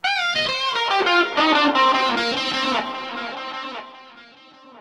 Short guitar sample made with my Epiphone Les Paul guitar through a Marshall amp and a cry baby wah pedal. Some reverb added. Part of my Solo guitar cuts pack.
processed, guitar, music, electronic